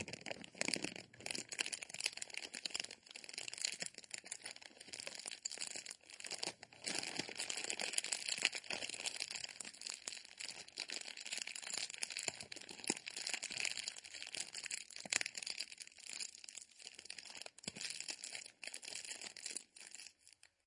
Plastic Being Crushed
I crushed a bag made of thick plastic.